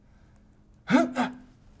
male pain grunt
male painful grunt
anguish, grunt, male, pain, painful